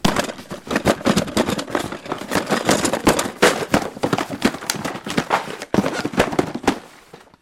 I'm shaking random stuff. Recorded with Edirol R-1 & Sennheiser ME66.